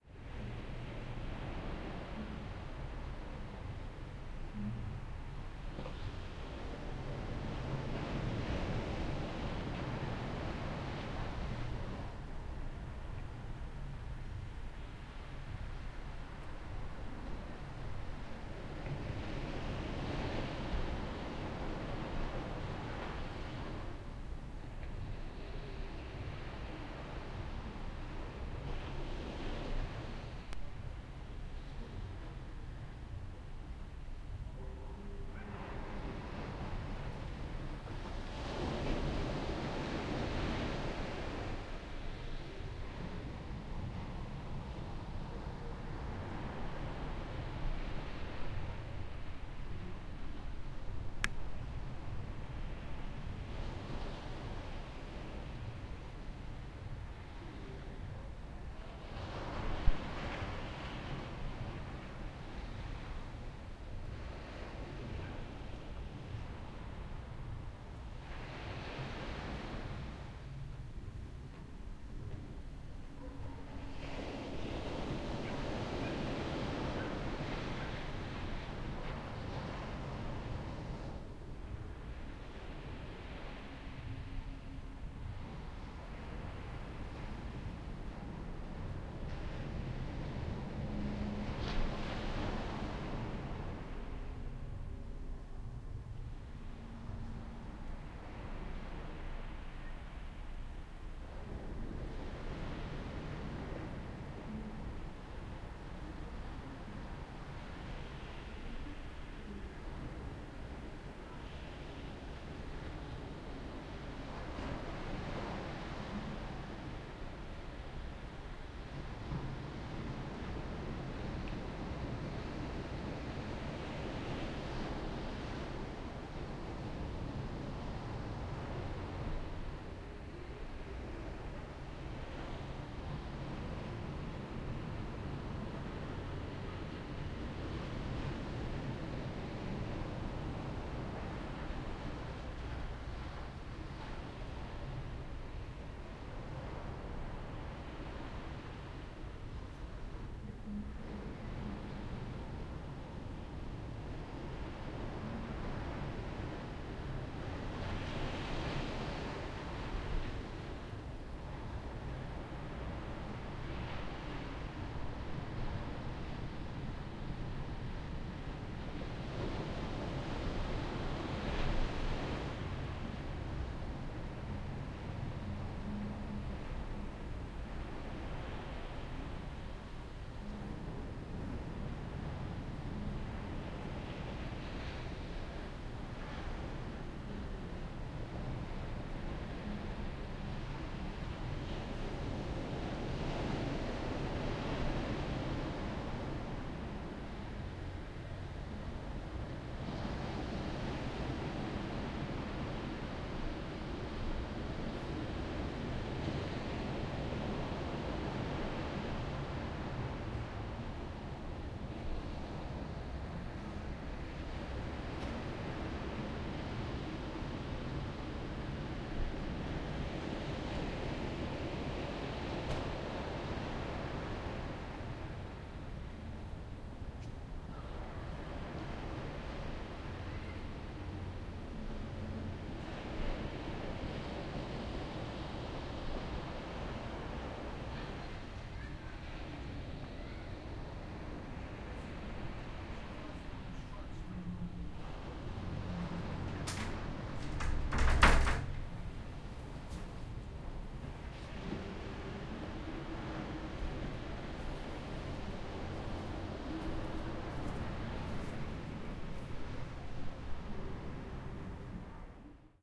Hotel do Mar 2012-6
Hotel do Mar,Sesimbra, Portugal 19-Aug-2012 23:12, recorded with a Zoom H1, internal mic with standard windscreen.
Ambiance recording.
Everybody seems to have gone to bed now. I leave the recorder in the room balcony while I watch TV in the room.
Mostly just the sound of waves with the occasional distant dog bark. Sometimes a very muffled sound from the TV can be heard.
At the end of the recording you can hear me opening and closing the door to the balcony and my footsteps. There are also some faint distant voices at this point.
Summer, closing-door, balcony, Sesimbra, hotel, ambiance, footsteps, distant-dog-barking, waves, Portugal, August, quiet, sea, night, opening-door